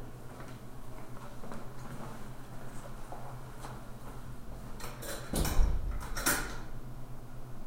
Bathroom WalkInTheBathroom
someone are walking in the bathroom
toilet, wc